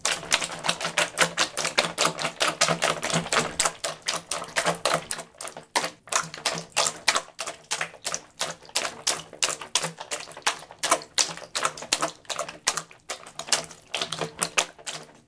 Fast Wet Footsteps in Small Shower Cabin
Wet footsteps! Faster. I'm stomping my bare feet in a pool of water on a plastic/rubber showermat in my shower. Recorded with Edirol R-1 & Sennheiser ME66.
feet, rainy